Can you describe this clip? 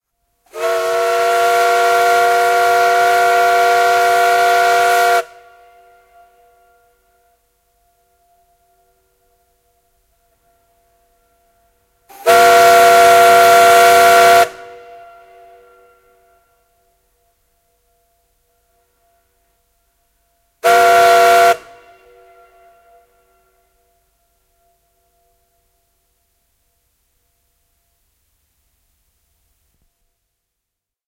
Höyrylaiva, sumutorvi, sumusireeni / A steamboat, tug, foghorn signal 3x, siren
Höyryhinaaja 'Lauri'. Rannalta 30 m etäisyydeltä laivan sireeni, huuto 3 x.
Paikka/Place: Suomi / Finland / Savonlinna, Saimaa
Aika/Date: 23.08.1975
Laiva, Ship, Yle, Sireeni, Laivat